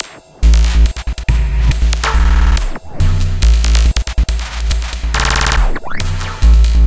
Glitch Loop 2
glitch, house, kick, snare, bpm, dubstep